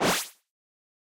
Bouncing Power Up 1 2
In-game power-up type sound made using a vintage Yamaha PSR-36 synthetizer. Processed in DAW with various effects and sound design techniques.
Notification, Happy, Sound, Synth, Player, Synthetizer, PSR-36, Design, Vintage, Power, Bouncing, Classic